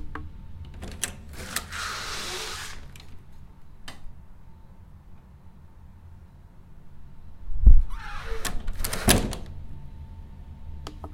door open close suction air tight
recorded with zoom h4n. door opening and closing to outside. suction/air tight motion